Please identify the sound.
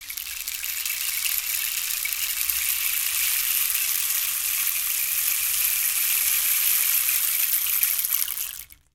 perc-rain-shacker-long
Long rain-shaker sounds, recorded at audio technica 2035. The sound was little bit postprocessed.
perc, percs, shacker